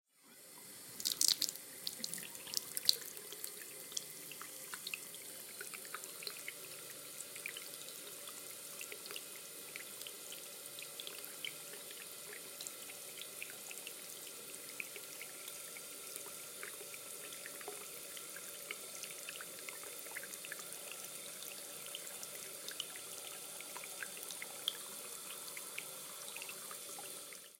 Running water into a sink from water faucet. Close recording.
Bathroom, Sink, Faucet, Running, Water, Field-recording, Close